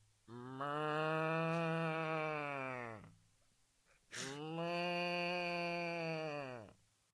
Human impersonation of a cow. Captured with Microfone dinâmico Shure SM58.
farmsounds, 3naudio17